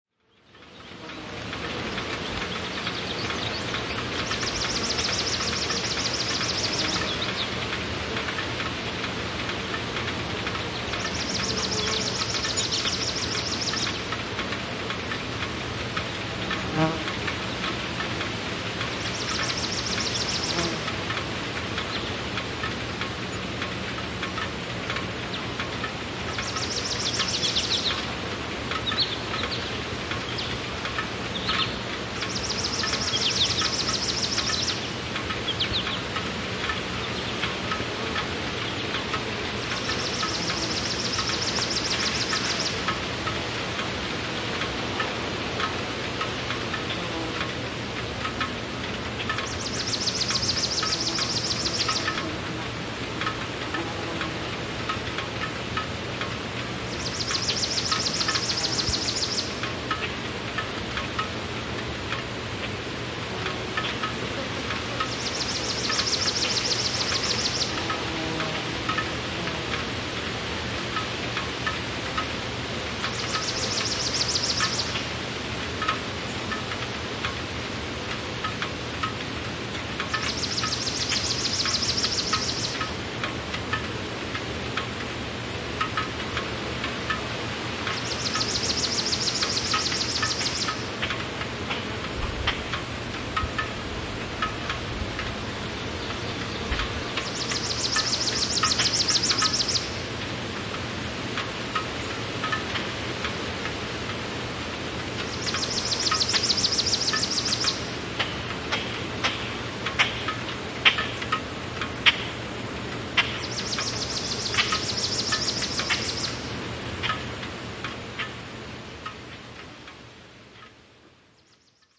A recording of a Ford Ranger Engine cooling down with insects in the background.
Recorded on a SD552 and a pair of audio technica 2021's.